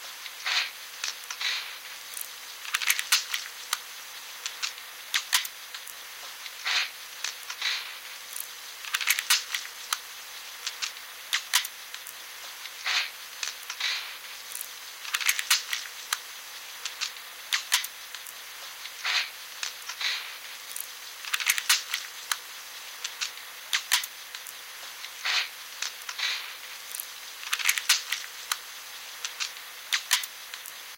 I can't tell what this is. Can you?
BBGun Loading via Microcassette recorder and recorded into the computer via line in.
BBGun, Loading, Microcassette, via